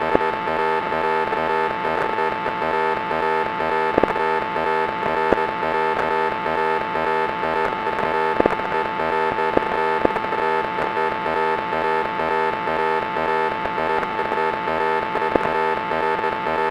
One in a series from a very strange and wonderful patch I created with my Nord Modular. This one sounds like a failed transmission with some static and messages from spaceships.
digital, funny, modular, noise, nord, synthesis, weird